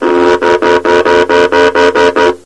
A gourd
rackett
announcing the overthrow of mindless conservatism. Recorded as 22khz
invented-instrument, gourd, handmade